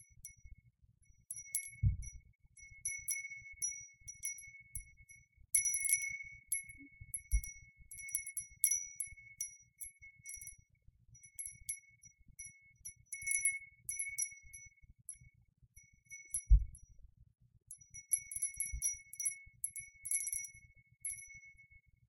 Gentle wind chimer
A wind chime sound I made with wind chimes.
effect, chime, wind, ding, gentle, sound